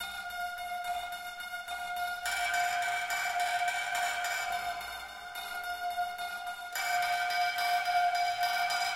plucked tension, guitar sounding like piano